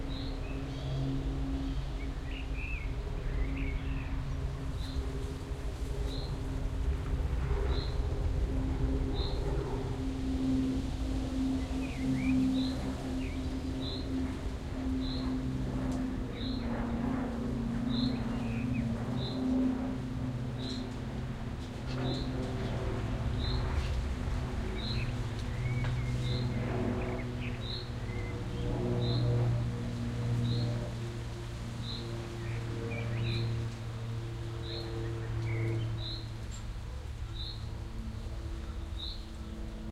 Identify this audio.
SuburbanSpringAfternoon-MS TestSetupPart1
Part 1 in a 6 part series testing different Mid-Side recording setups. All recordings in this series were done with a Sound Devices 302 field mixer to a Sound Devices 702 recorder. Mixer gain set at +60dB and fader level at +7.5dB across all mic configurations. Mixer - recorder line up was done at full scale. No low cut filtering was set on either device. Recordings matrixed to L-R stereo at the mixer stage. The differences between recordings are subtle and become more obvious through analyzers. Interesting things to look at are frequency spectrum, stereo correlation and peak and RMS levels. Recordings were done sequentially meaning one setup after the other. Samples presented here were cut from the original recordings to get more or less equal soundscapes to make comparing easier. Recordings are presented unmodified. Part 1: Pearl MSH-10 single point MS microphone.
atmosphere, birds, field-recording, mid-side, outdoor, spring